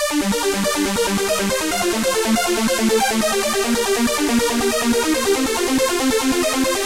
Cerebral cortex
A little trance line i made using Fl Studio enjoy! 140 BPM
synth, hardtrance, trance, sequence, hard, techno, melody